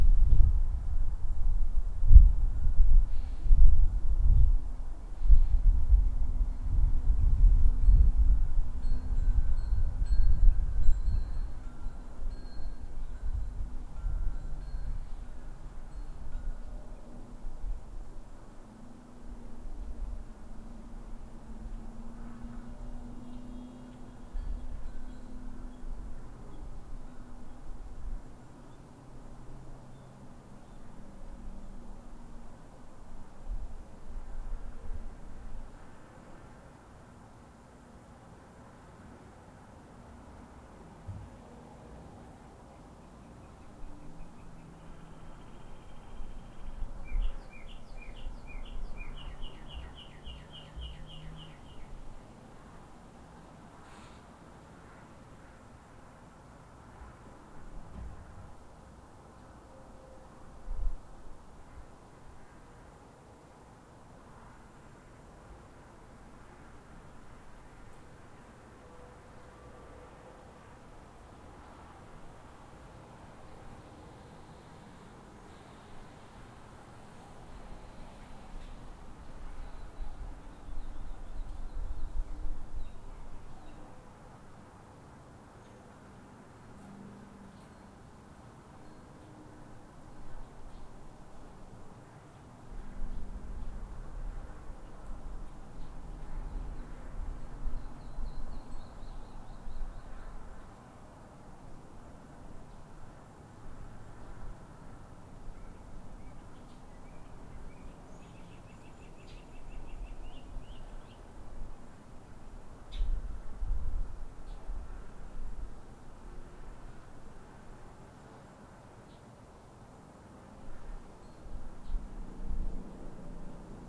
Out on the patio recording with a laptop and USB microphone. There may be a dog bark in here somewhere.
patio, atmosphere, field-recording, outdoor